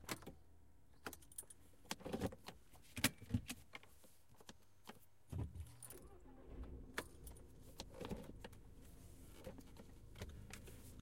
Audi A3 ignition start internal
start, Audi, internal, A3, ignition